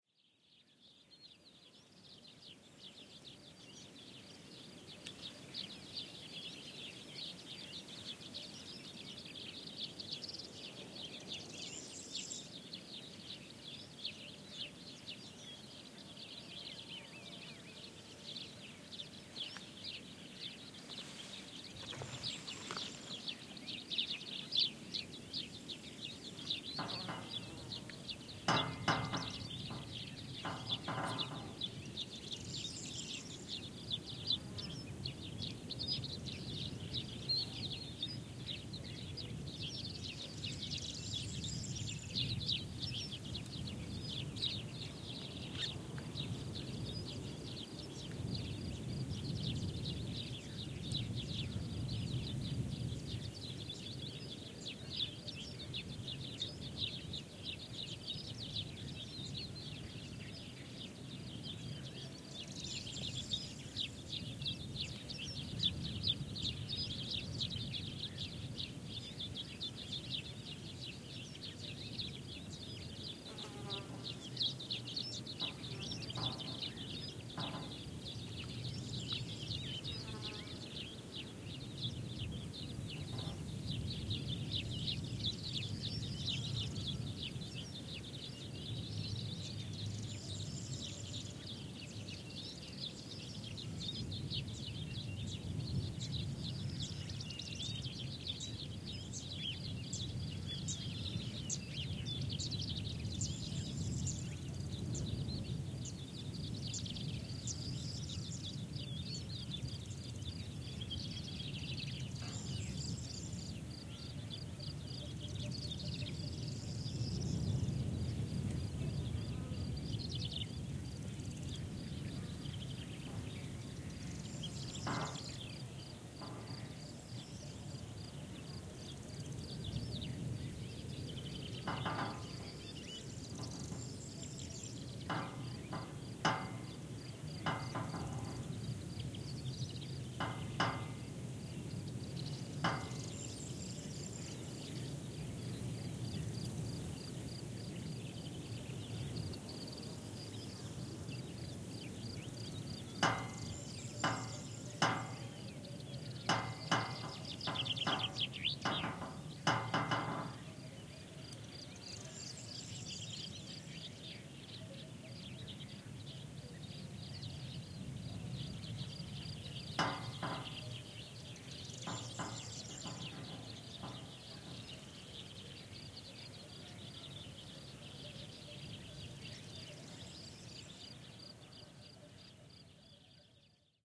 Only soft-sounds: just Crested Larks, Corn Bunting, Common Sparrow callings, the soft bangs of a loose traffic sign, smooth wind on vegetation, a passing insect, distant sheep bells... Actually, a Fan Tailed Warbler can also be heard singing as it flies (now that I think of it, this recording is far from minimalistic!). Registered somewhere along the way from Belalcazar to Valsequillo (Cordoba Province, S Spain), THE middle of nowhere. This sample is quite pristine, no cars, no planes, no people, no machinery either, it exemplifies my idea of a sonic paradise, a personal Arcadia. Audiotechnica BP4025 inside windscreen and into SD MixPre-3 recorder.
ambiance, birds, Corn-bunting, country, Crested-lark, field-recording, nature, south-spain, spring